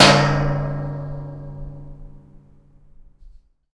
One of a pack of sounds, recorded in an abandoned industrial complex.
Recorded with a Zoom H2.
percussive, clean, percussion, industrial, metal, high-quality, urban, field-recording, metallic, city